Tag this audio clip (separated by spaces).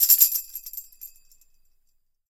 orchestral chimes chime drums rhythm percussion sticks drum hand percussive Tambourine tambour